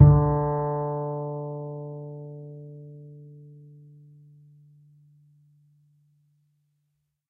This standup bass was sampled using a direct pickup as well as stereo overhead mics for some room ambience. Articulations include a normal pizzicato, or finger plucked note; a stopped note as performed with the finger; a stopped note performed Bartok style; and some miscellaneous sound effects: a slide by the hand down the strings, a slap on the strings, and a knock on the wooden body of the bass. Do enjoy; feedback is welcome!